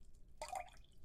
Sound of water being poured in a container with liquid already in it.